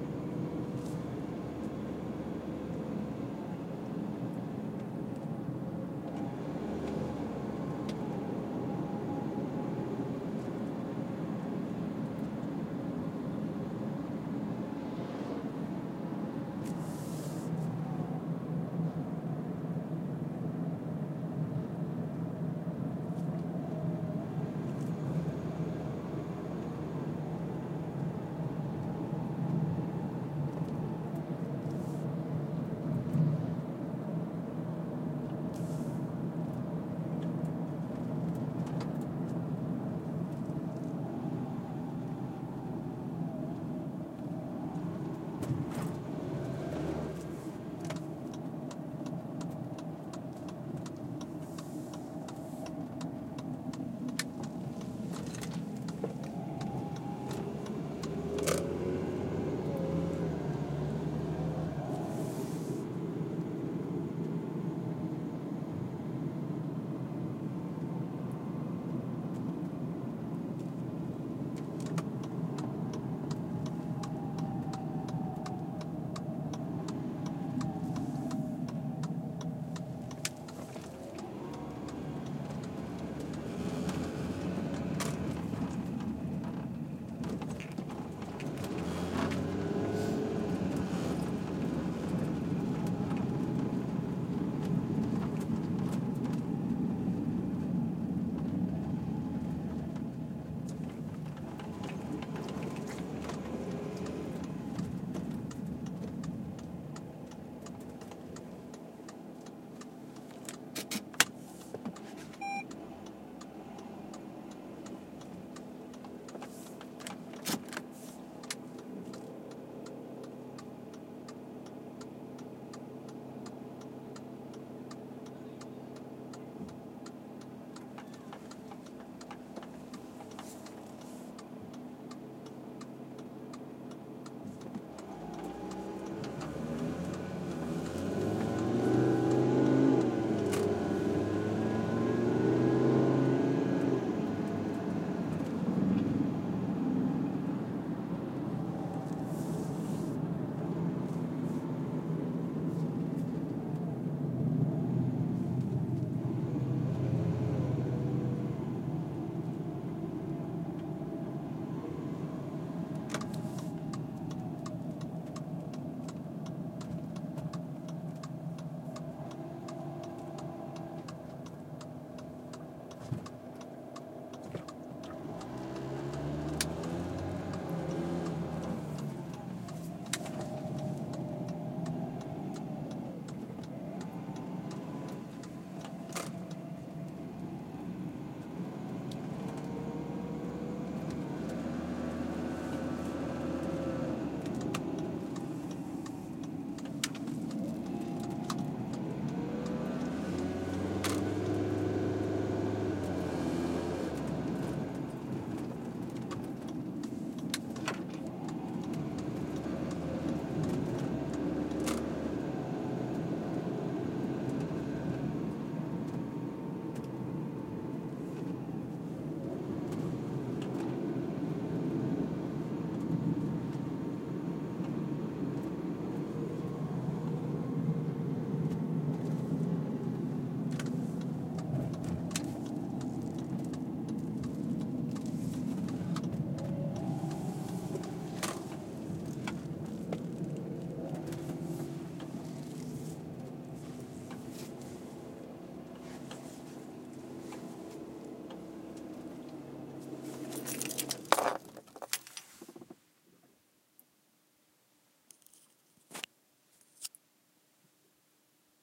inside VW transporter driving
driving in a VW transporter
car, driving, motor